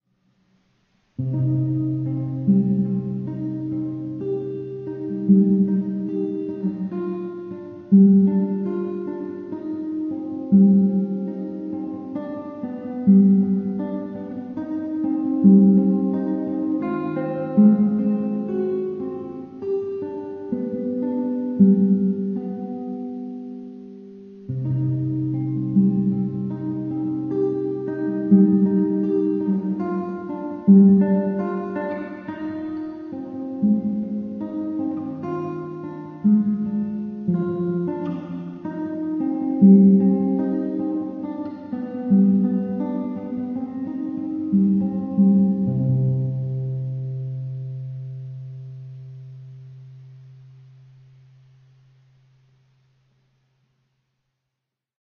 This is just a part of the Sor song and I added the large hall sound as I love that sound which reminds of some lonesome guitar player playing from his heart either for free or a few pesetas. Thanks. :^)